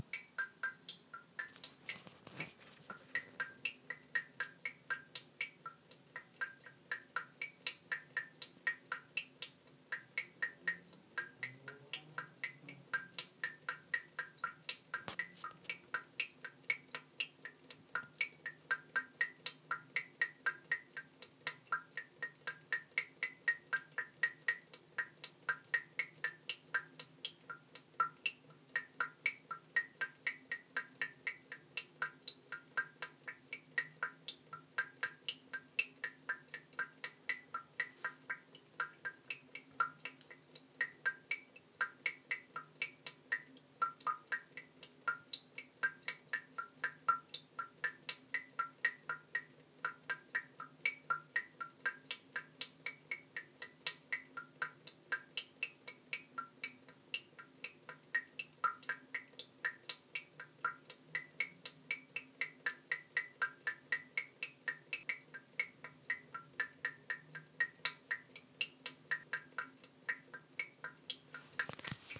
Musical drop (at a bathroom)
Hidden music in urban life